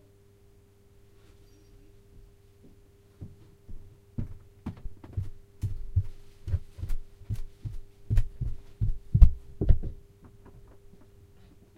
Descending Stairs (from cupboard)
The sound of coming down the stairs recorded from inside the cupboard under the stairs.
Recorded with a Zoom H1.
steps, wood, wooden, descend, stairs, staircase, footsteps, descending, floor